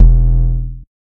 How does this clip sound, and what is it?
Bombo sintetizado en VCV Rack